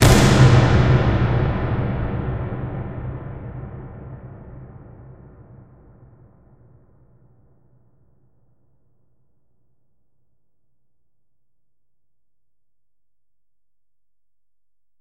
Cinematic Boom Sound.
WHEN USING THIS SOUND I RECOMMEND THAT YOU WRITE DOWN THE ORIGIN SO YOU CAN PROVE IT IS LEGAL.
boom, cinematic, destruction, epic, explosion, tnt, trailer